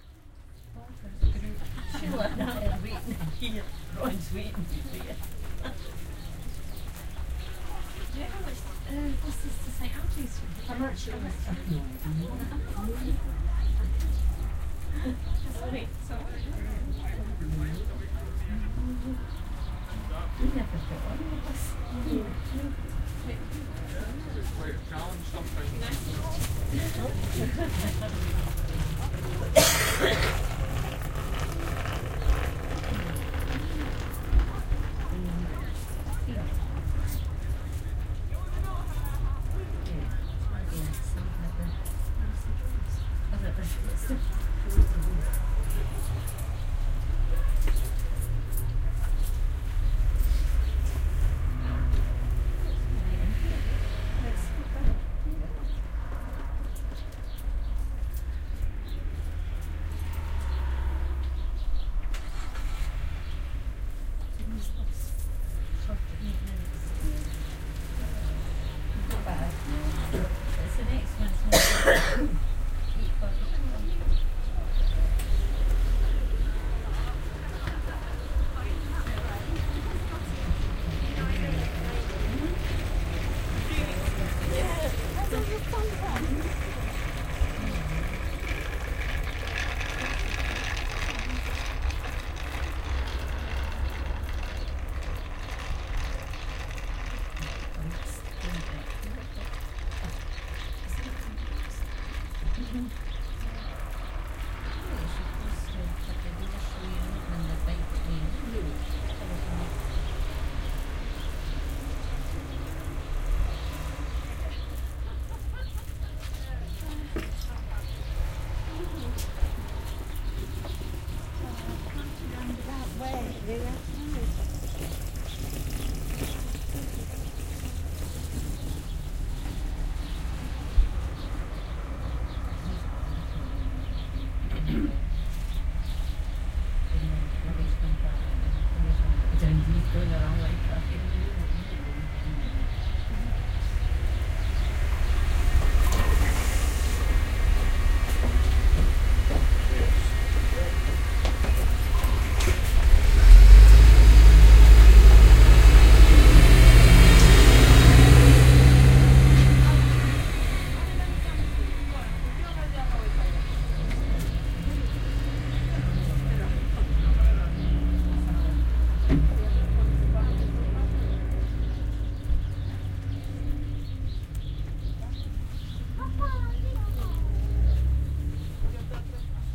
20060813.bus.stop
voices of people waiting for the bus near Leuchars (Scotland). Cars passing by, the bus finally arrives. Soundman OKM mics, Sony MD, iRiver H120 recorder